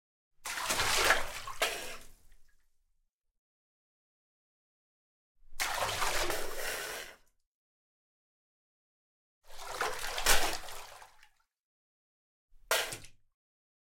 Swimming Breaking Surface
Breaking the surface of water after diving.